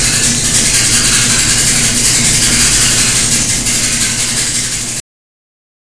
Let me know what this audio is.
Haywire Dryer #1 is the sound of things coming off the rails. Of the objects that keep the reproduction of the social moving at a steady clip malfunctioning, faltering, fucking up. In this failure we can hear glimpses of possibility and potential.
Haywire Dryer #1 was recorded with a Tascam dr100 while playing ping-pong in Santa Cruz.
urban, field-recording